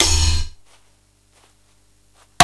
The dungeon drum set. Medieval Breaks
medieval, dragon, idm, amen, breaks, medievally, breakcore, dungeons, rough, breakbeat